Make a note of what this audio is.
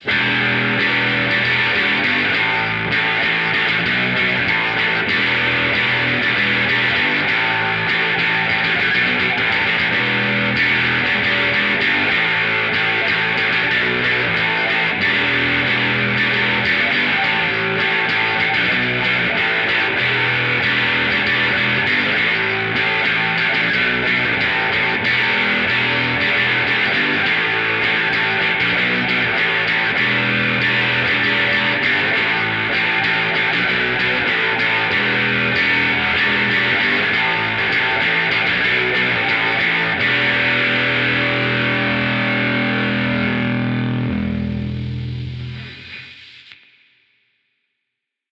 Figured I'd finally give back to this great site. Here's a thrashy guitar riff I just improvised and recorded. I have no use for it, as it isn't my style and I was just messing around. enjoy.
guitar, guitar-riff, heavy, metal, punk, rhythm, rock, strumming, thrash